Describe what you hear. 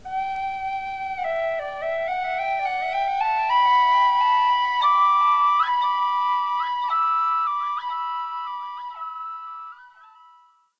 Short Andean riff played by me, mixed with Audacity.
pipes, andean, mountains, echo